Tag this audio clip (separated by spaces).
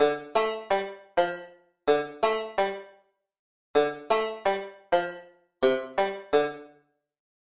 Banjo Guitar Sample